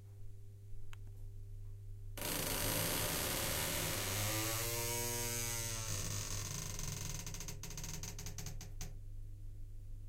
A creaking shower screen recorded with an Edirol.